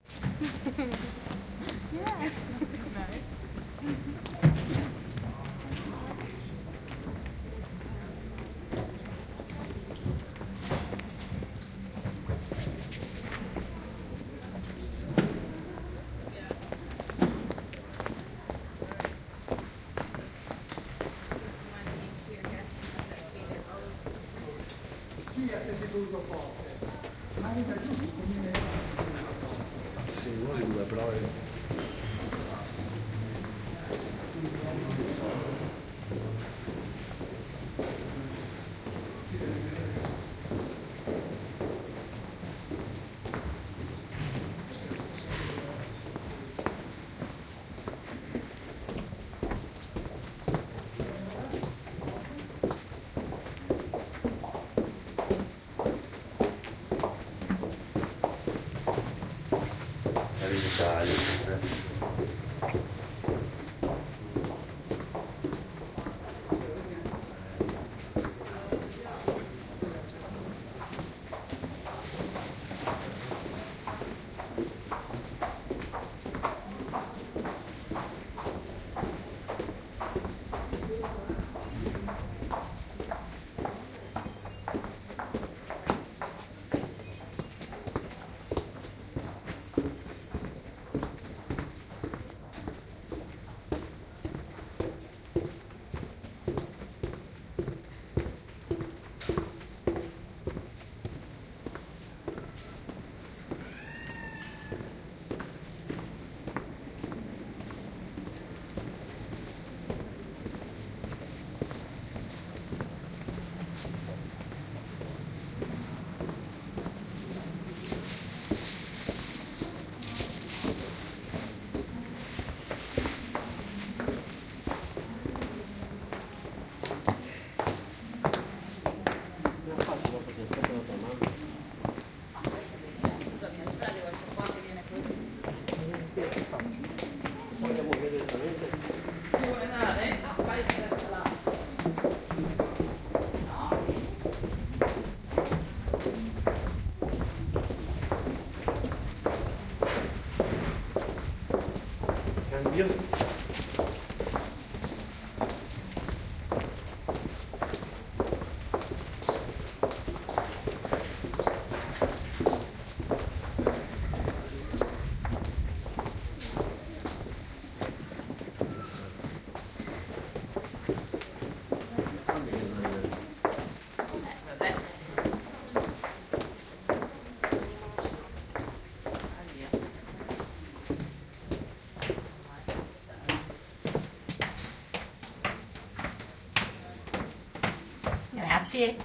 20080303 Venice walkthrough2
During the walk one first hears people laughing, next, me walking through the streets of Venice, other people passing by, a mobile phone ringing, and finally an old woman saying 'grazie' for I let her pass the narrow street : ).
binaural, city, field, footsteps, recording, venice, walkthrough